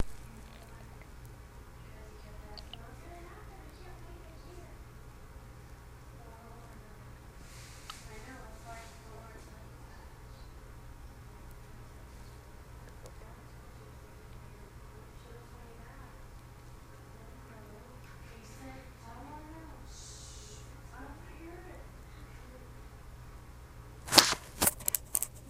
Trying to record something, not happening.